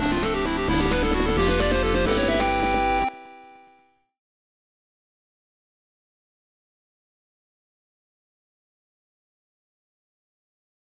Fight Win Tune
A musical sound effect I made for a collaborative school project. This was used for when the player won a battle. Made in LMMS.
effect, fight, level-up, musical, sound, sound-effect, successful, win